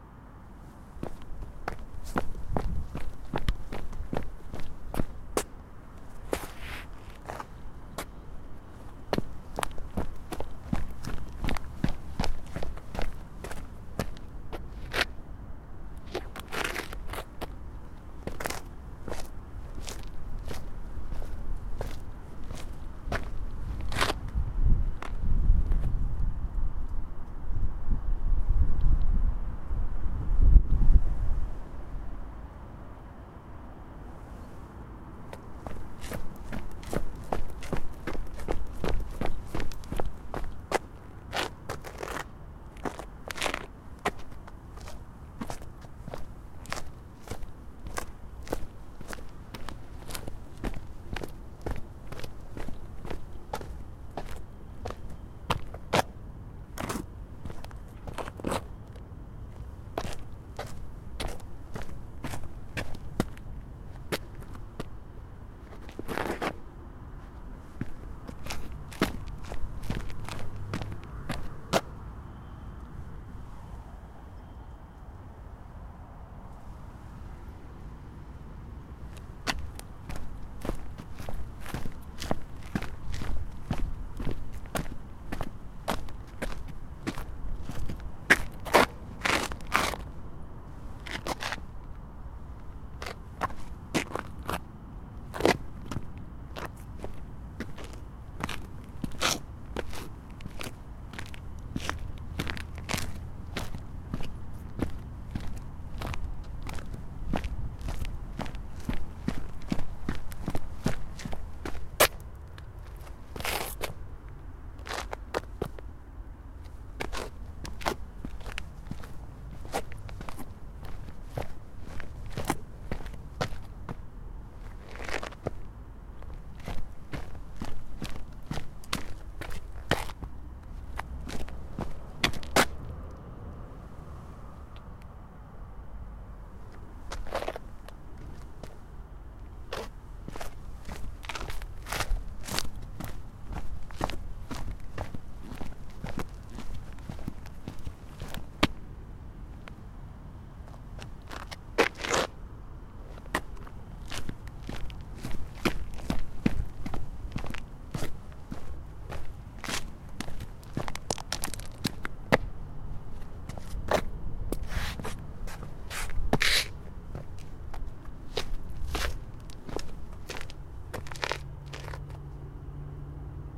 Walking on pavement with sport shoes